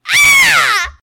WARNING: LOUD
not sure what I screamed at this time